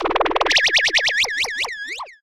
I made this sound in a freeware VSTI(called fauna), and applied a little reverb.